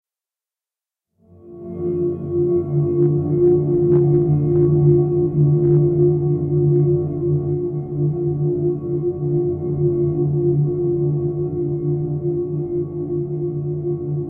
Nature Drone
Created using a small sample of natural noise and a spectral drone-maker plug in by Michael Norris